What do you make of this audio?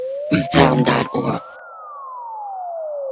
Track was created using Audacity®. The track was a generated chirp of 1 sec. repeated once and then reversed. The Gverb filter was used with a low early reflection and high room size value. and then the Echo filter with 0.2 delay time of seconds and decay factor of 0.5 seconds was applied.
ambulance, Audacity, chirp, effects, police, sound